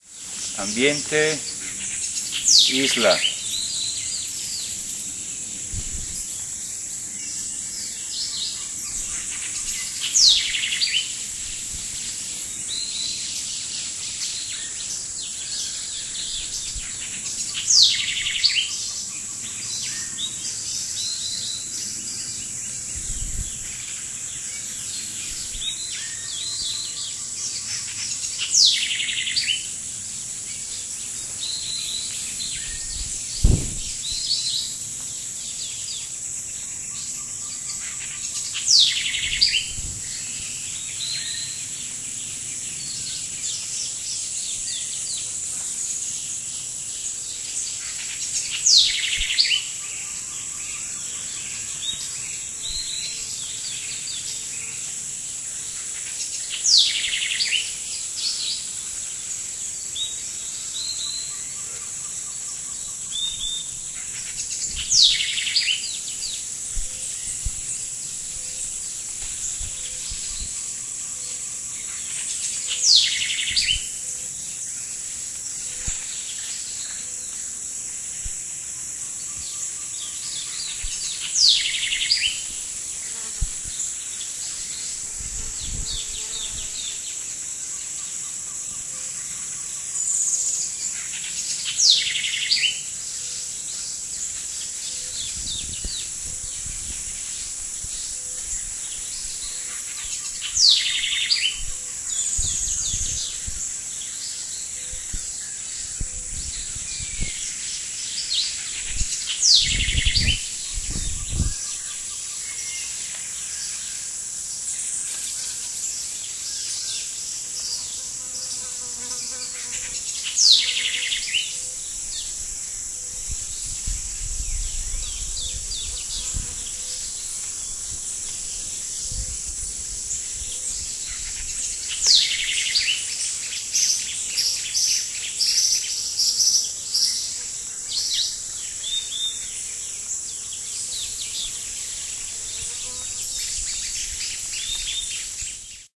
Rey Ambiente Isla
Tropical Day Ambiance on an island in the lake of Nicaragua. One very present bird sounds like a "loop" but it is nature as is.
tropical, insects, birds, stereo, ambience, natural